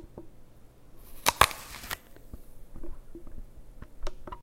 Sound of someone biting into an apple.